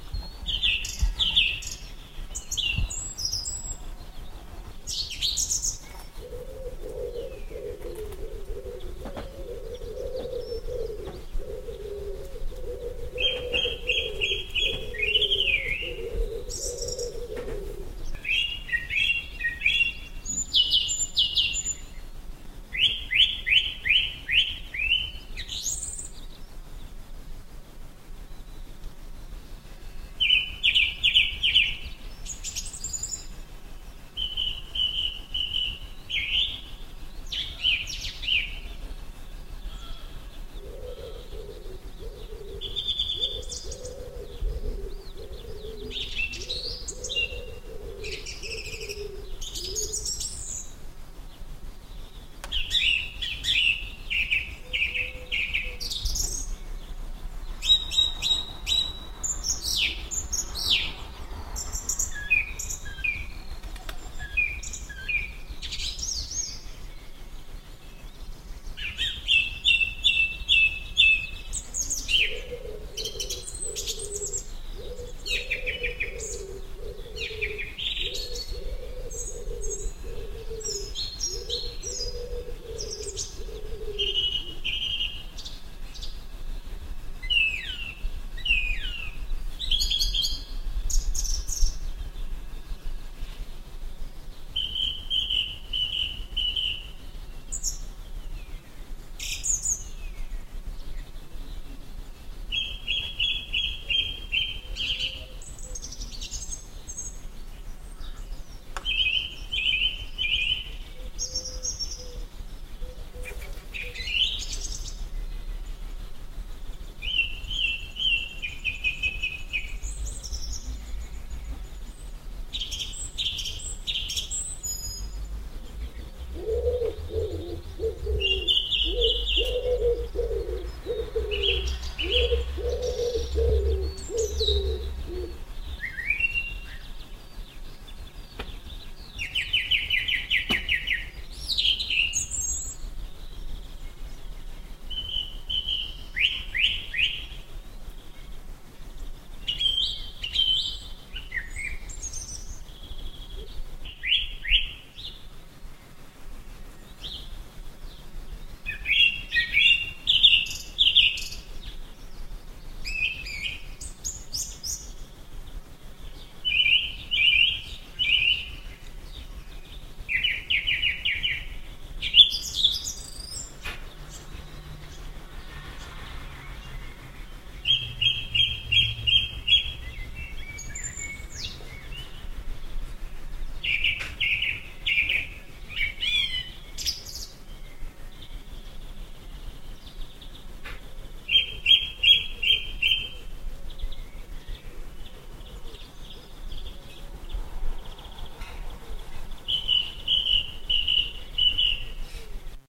blackbird wood pigeon
A blackbird sings in my garden in the long hot English summer of 2006. A wood pigeon can be heard in the background. Minidisc recording.
pigeon, birdsong, atmosphere, wood, bird, ambience, blackbird, field-recording